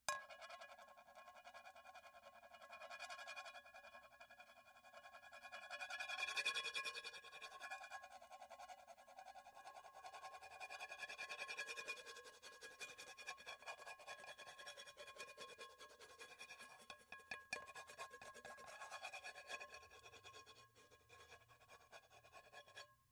Collective set of recorded hits and a few loops of stuff being hit around; all items from a kitchen.